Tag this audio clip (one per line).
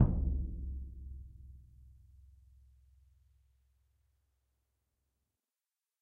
concert
drum
bass